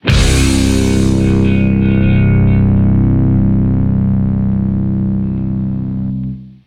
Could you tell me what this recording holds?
00 C death metal guitar hit
Guitar power chord + bass + kick + cymbal hit
blackmetal, death, metal, black, guitar, bass, deathmetal, hit